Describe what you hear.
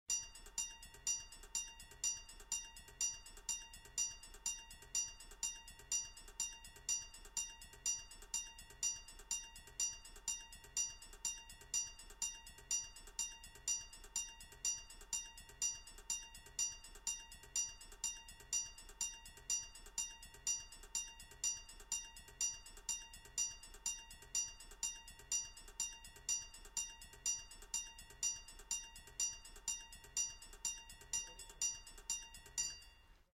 railroad crossing signal